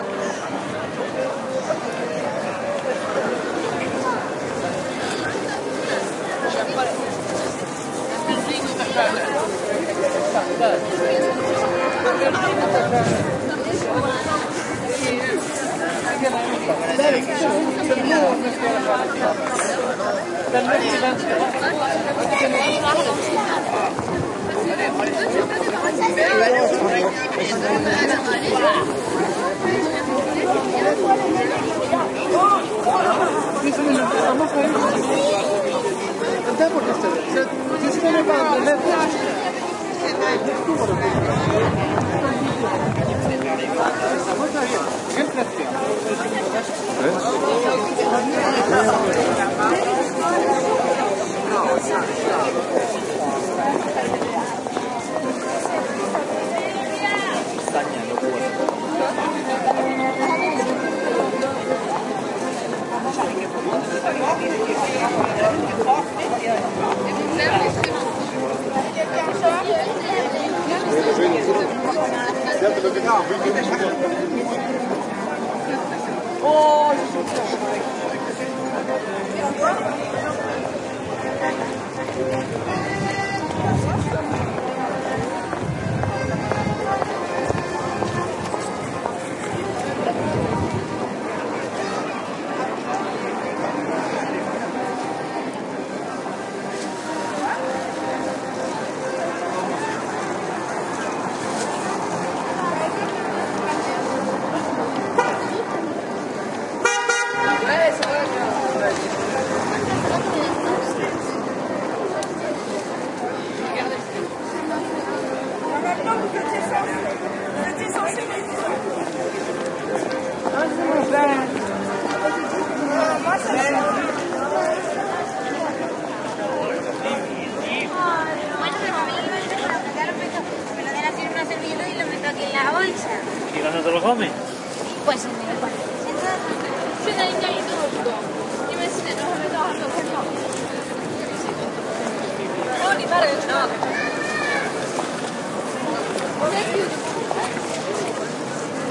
street ambiance in Brugge (Bruges, Brujas), voices speaking different languages, car horn, a street musician playing accordion, some wind noise. Olympus LS10 internal mics